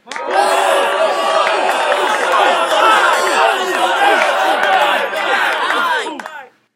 A crowd cheering very loudly. Some claps. Like a crowd at a wrestling match (all me, layered in audacity) recorded with a Mac's Built-in Microphone.